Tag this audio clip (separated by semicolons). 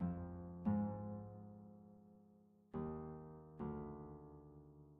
fi; sci